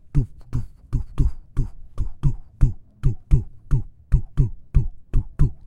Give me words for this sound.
LEE JH XX TI07 duhduhduhduhduh
Sound collected in Leeuwarden as part of the Genetic Choir's Loop-Copy-Mutate project.